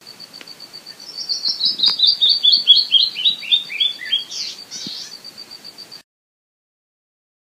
The song of a Canyon Wren in the mountains of far west Texas.
birdsong, texas, canyon